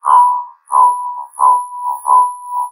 Alarm sound 003
An alarming sound.
Part of the Glitch Alarm series.